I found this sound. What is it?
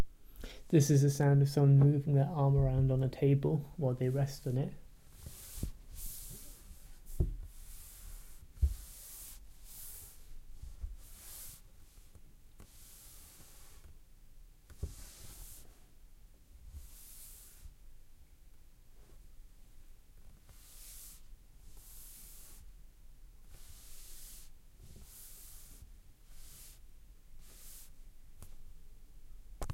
the sound of an arm sliding across a table